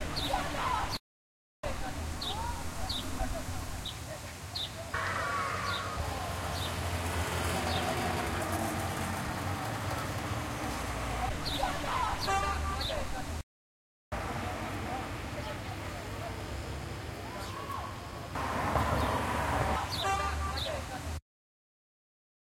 fx loop
A loop made from stuff i recorded with tascam dr-07mkII
ambiance, ambience, ambient, atmosphere, background-sound, birds, city, field-recording, general-noise, natural, nature, outside, soundscape